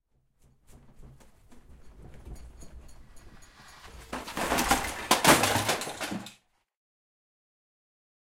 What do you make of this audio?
Short earthquake with crash
earth-quake, earthquake, glass, jordb, quake, rumble, shake, vning, window